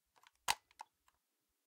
Latch Clicking
Some form of latch being clicked, can also be used as bullet loading
button, press, click, bullet, switch, load